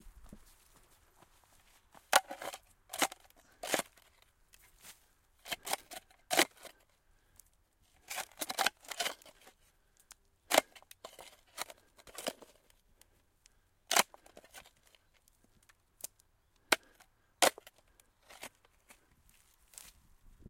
Using a shovel to dig a hole in very dry dirt. Recorded with a Zoom H5.
arid, dig, digging, dirt, dry, earth, field-recording, ground, hole, scraping, shovel, spade